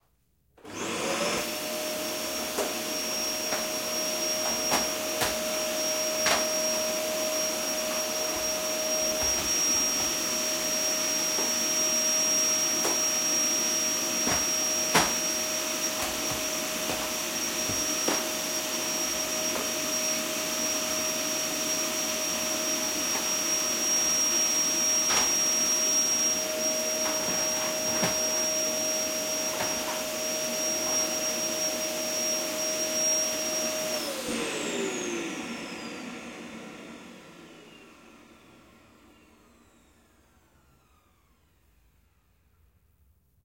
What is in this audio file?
Vacuum cleaner on carpet, bumping into furniture. Recorder with Sound Device 702T in a city apartement.